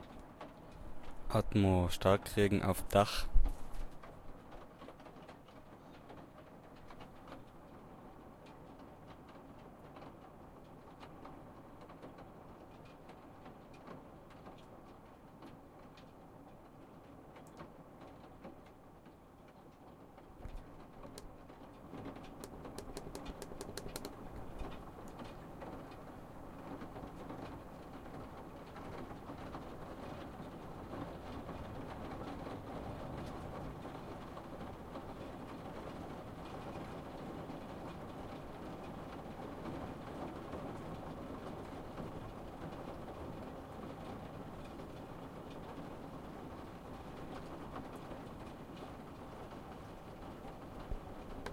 Raining on a roof window recordet with my Zoom H4n Pro field recorder